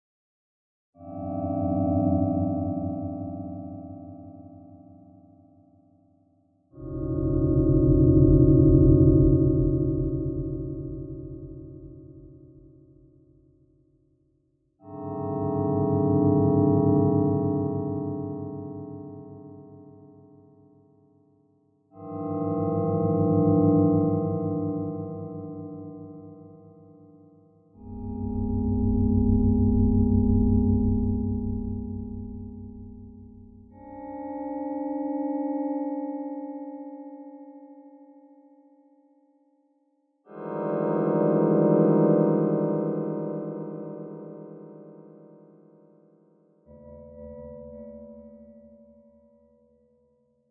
Eerie Spooky Horror Sound
An eerie high sound which can be used for horror or mystery.
scary, creepy, spooky, nightmare, mystery, ghost, haunted, eerie, horror